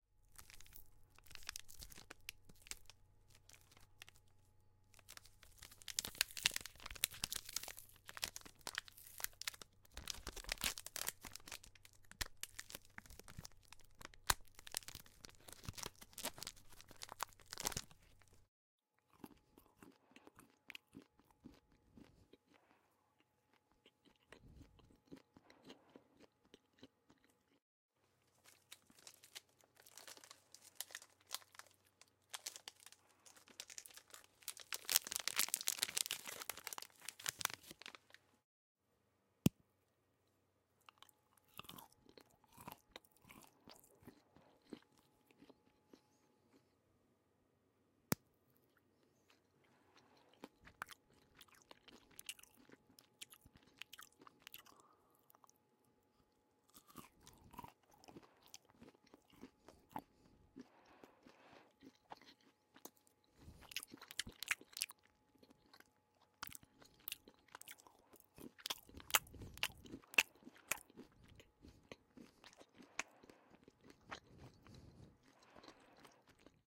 Chocolade Eating
opening a pack of chocolate and eating it
smack, food, eat, eating, pack, chocolate, eating-noises, packing-material